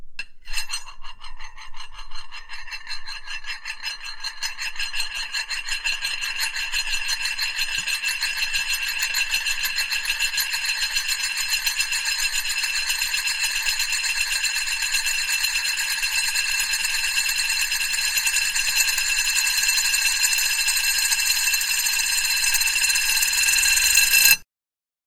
Spinning 3 inch diameter x 1/8 inch thick aluminum disc on a ceramic plate. Recorded in mono with an Edirol R44 recorder and a Shure SM81 microphone.